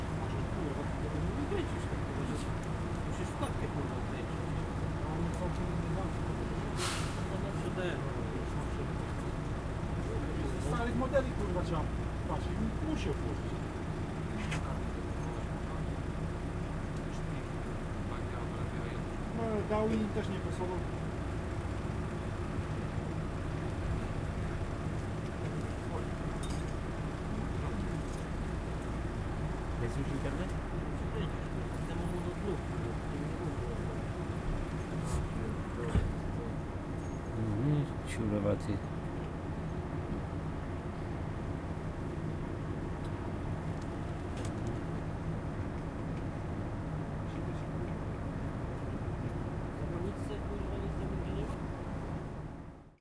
baza tiry3 310711
31.07.2011: about 11 pm. the international logistic company base in padborg. the first day of my ethnographic research on truck drivers culture. the sound of whirring trucks and some talks between truck drivers.
drone, electricity, field-recording, nihgt, noise, padborg, people, sizzle, truck, truck-drivers, whirr, whirring